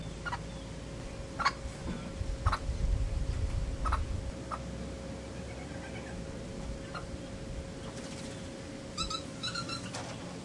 Quiet calls from a Green Aracari. Also heard at the end is the call of a Plush-crested Jay. Recorded with a Zoom H2.
aracari, aviary, bird, birds, exotic, field-recording, jay, toucan, tropical, zoo